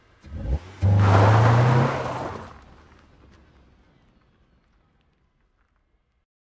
Wheel Spin on Gravel
A recording of my car wheel spinning on gravel in a local car park at night. Recorded using a Tascam Dr100 portable recorder and a Behringer C4 condenser microphone.
Acceleration, Car, Cars, Engine, Exhaust, Fast, Field-recording, Loud, Wheel, Wheels, Wheel-spin